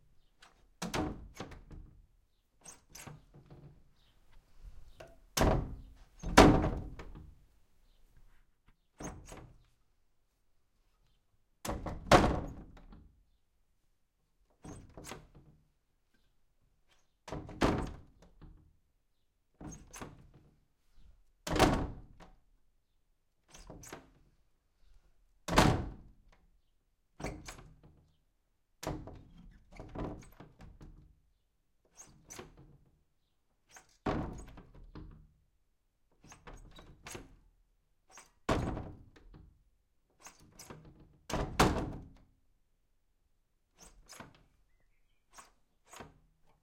wood shutter inner door with antique handle lock open close shut hit frame rattle and handle turn squeaks end various on offmic int perspective
wood, close, antique, shutter, lock, handle, door, squeak, open